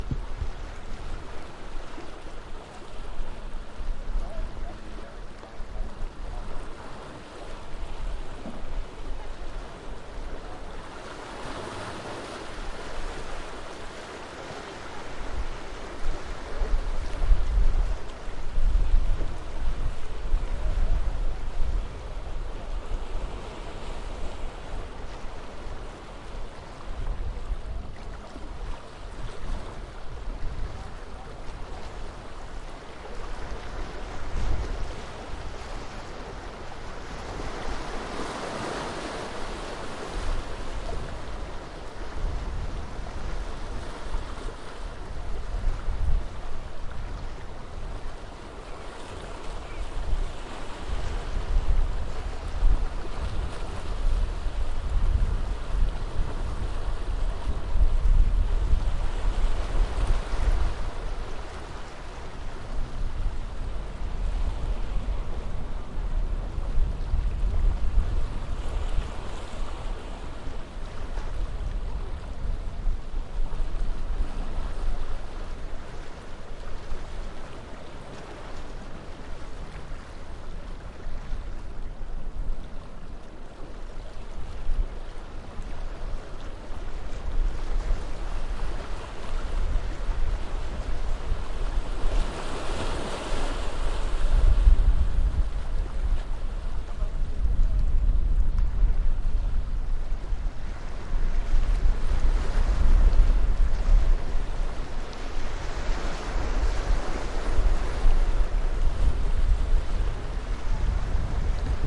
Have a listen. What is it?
SEA FAR
Field records of sea on a windy day at far range with people walking around
field-recording, sea, water, waves, wind